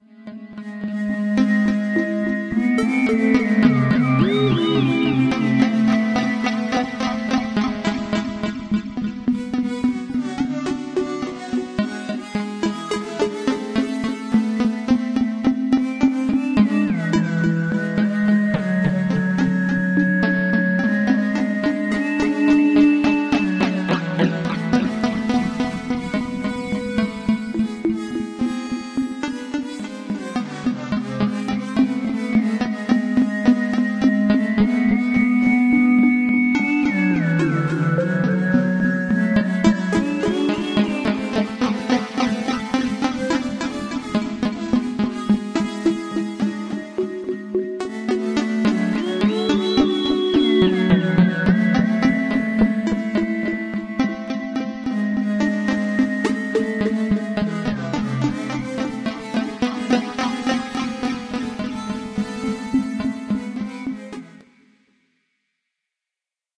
More output from yet another Analog Box circuit that, as far as I can figure, I created. Not quite as musical as you might prefer, because the delays don't seem to be timed with the pitch changes, but whatever... this still evokes a sort of mood for me. I can't quite place it, but it kind of takes me back to the early 1970's, reading "Saga UFO Report" and watching "In Search Of...", even though neither had music like this. So I just thought I should include this little snippet of output in case anyone else finds it fun. You're not likely to find it useful, IMHO. The reason I say "as far as I can figure" is that this circuit goes way back to my early days of messing around with Analog Box, and for all I know, I may have started with an existing bot and just changed it around, but when I went looking through the available jambots out there, I never noticed anything very much like this one. So I think I created it. Oh well. In any case, I did create this sound file.